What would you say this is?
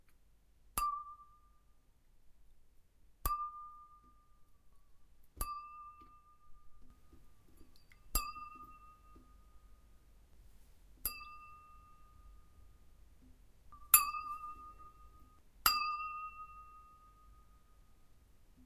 Sound of flicking a half filled glas of wine